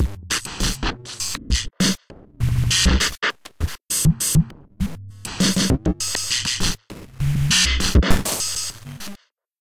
One in a series of 4-bar 100 BPM glitchy drum loops. Created with some old drum machine sounds and some Audio Damage effects.
100-bpm, 4-bar, bass, beat, digital, drum, glitch, loop, snare, sound-design